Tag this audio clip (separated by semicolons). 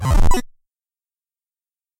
FX; Gameaudio; SFX; Sounds; effects; indiegame; sound-desing